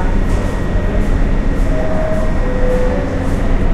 Subway Inside Train Noise with Attention Noise
attention, city, field-recording, inside, new-york, nyc, platform, subway, tannoy, train, underground